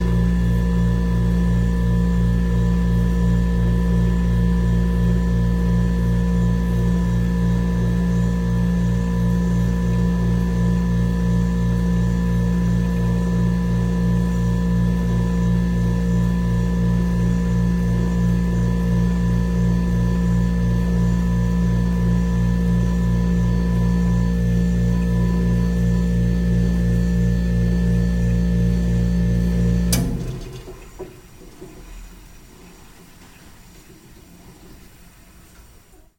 water cooler running turn off
This is a water cooler making noise as it's refrigerating the water. I caught it towards the end.